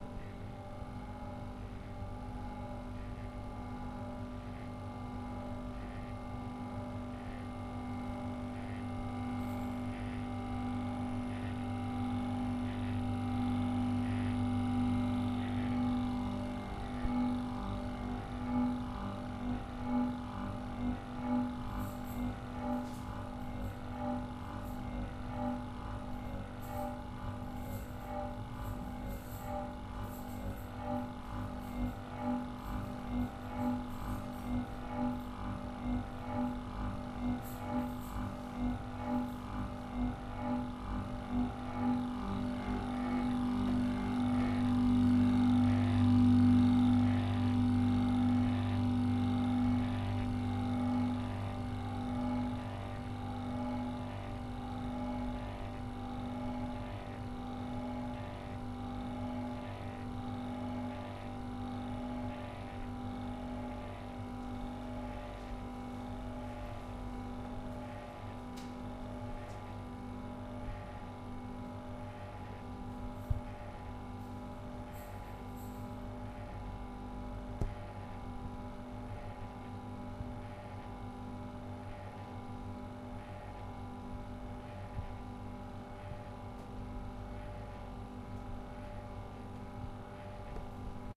STE-017-ceilingfan
Interesting sounding ceiling fan from a hotel room in Lucknow, India. The mics are moved in relation to it during the recording leading to changes in tone.
Also some background sound of glass bracelets being arranged; I did ask my sister if she could be quiet for a minute, but these cut through from the next room. Such is life :)
Unprocessed recording from Zoom H2 built-in mics (probably in the more directional mode).
fan, mechanical, rhythmic, machine